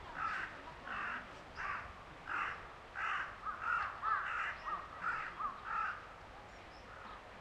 Japanese raven in a park. Saitama (japan). Nov 2013 Marantz PMD 661 MK II portable recorder.